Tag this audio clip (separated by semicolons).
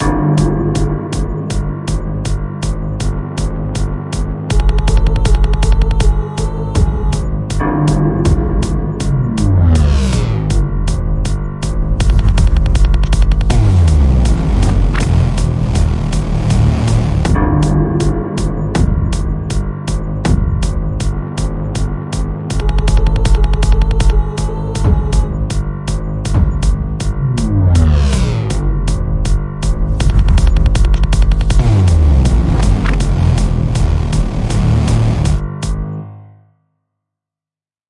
shark; game; fish